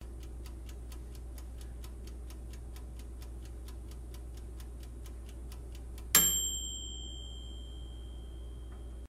Cookies Are Ready!
This is a sound effect of an oven baking and making the "ready" sound. This would be a great sound effect to use if you are making a project about baking.
baking, oven-sound, oven